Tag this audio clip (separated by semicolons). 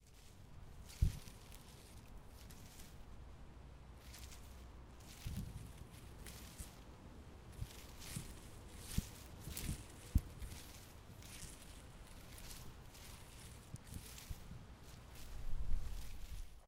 wind; smooth; scl-upf13; leaves